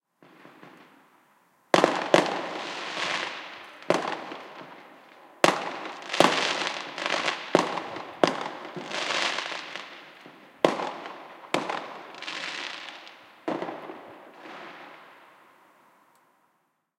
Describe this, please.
Halloween firework captured from circular courtyard, bass roll off applied.
fire-crackers, bullet, bomb, boom, fireworks, woosh, fire-works, firecrackers, halloween